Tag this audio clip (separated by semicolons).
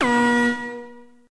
impresora; percussion; printer; short